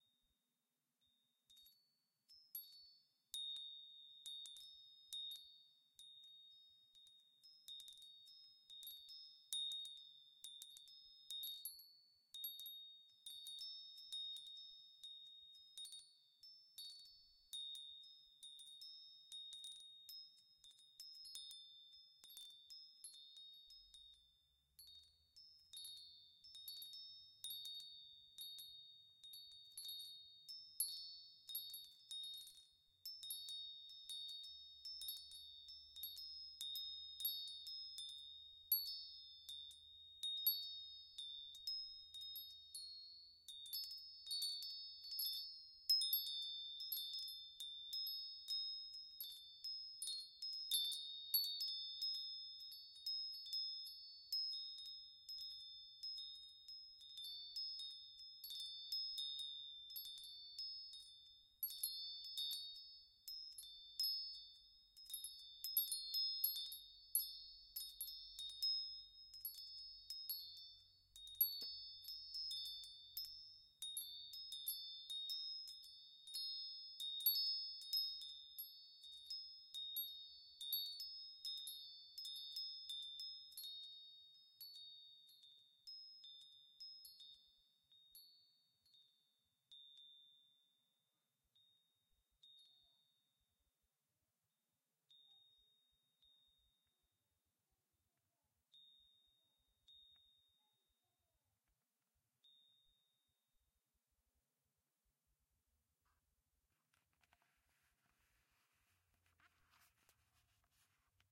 Wind Chimes
Close up stereo recording of a small metal wind chime. Recorded on Tascam DR-05.
Bells, Chimes, Wind